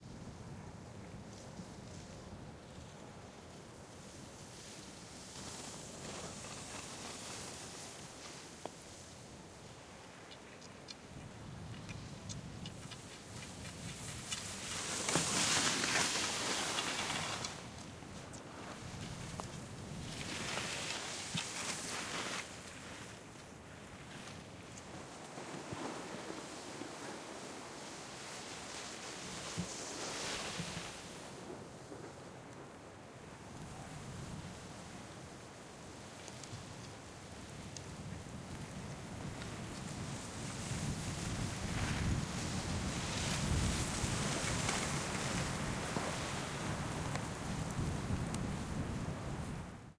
several people passed by me on skis and snowboards 14 march 2023